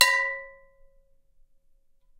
A skillet hit with a spoon